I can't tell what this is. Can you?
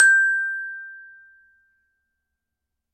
Samples of the small Glockenspiel I started out on as a child.
Have fun!
Recorded with a Zoom H5 and a Rode NT2000.
Edited in Audacity and ocenaudio.
It's always nice to hear what projects you use these sounds for.
campanelli, Glockenspiel, metal, metallophone, multi-sample, multisample, note, one-shot, percussion, recording, sample, sample-pack, single-note